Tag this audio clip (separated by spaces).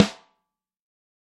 sample mapex drum electrovoice snare 14x5